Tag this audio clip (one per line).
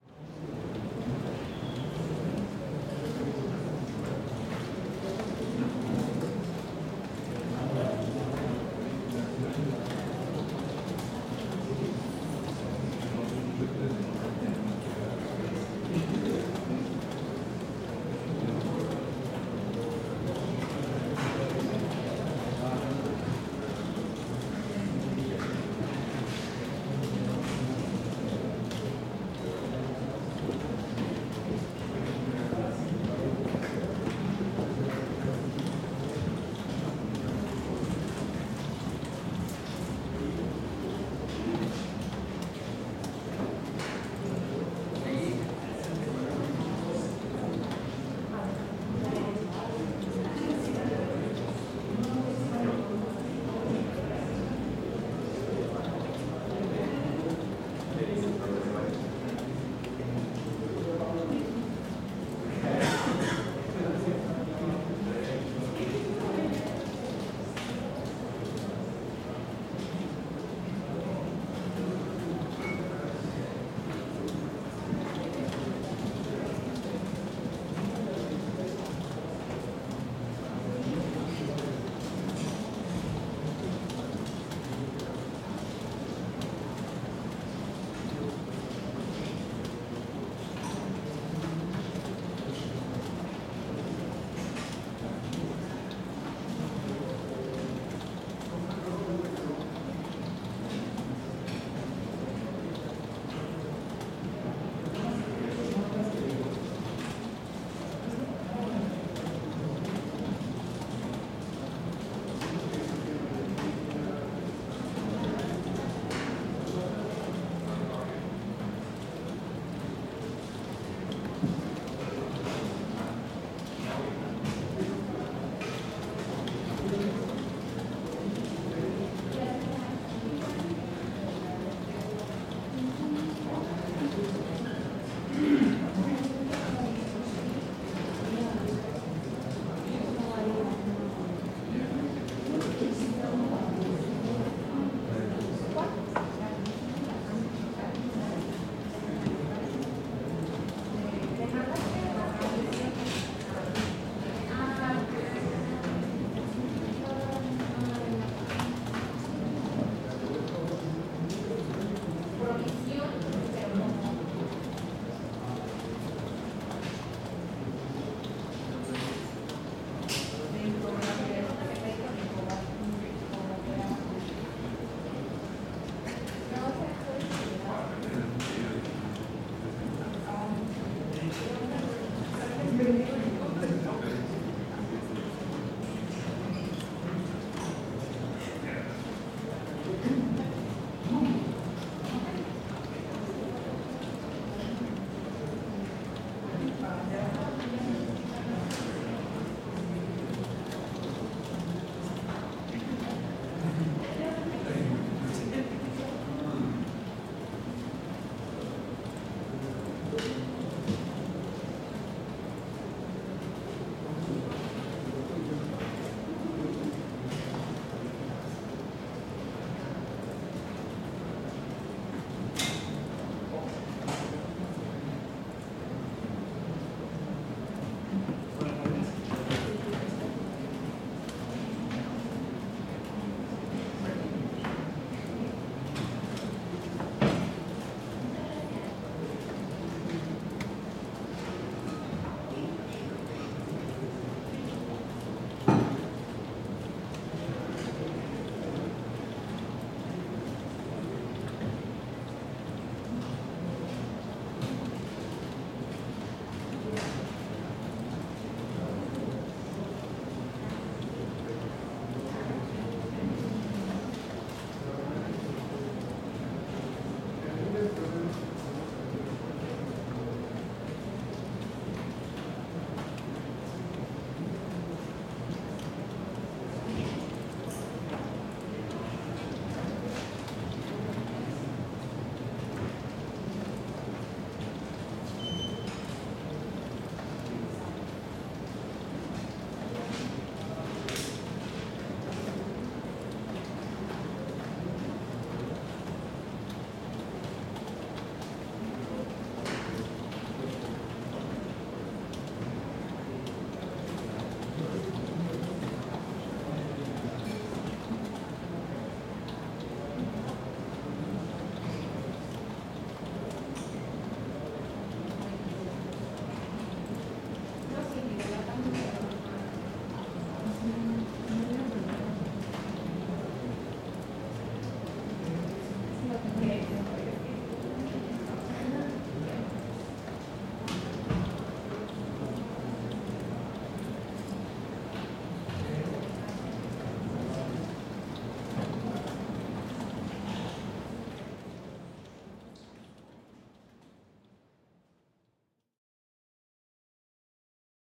Field-recording
Walla
Office
ambience